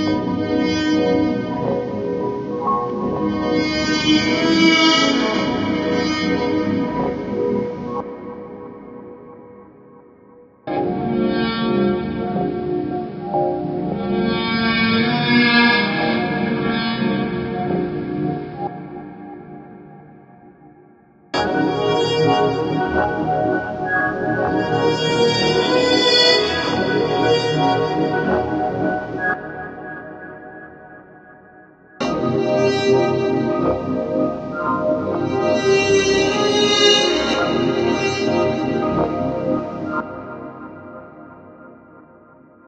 Dreamy Granular Horns
*Un-used asset from project*
There are 4 of the same "sample" at different pitches are "random" granular position and spacing so each one is a little different besides pitch.
Random, sound, effect, pattern, Repeating, glitchy, synth, sample, three-pitch, Oscillation, granular, lofi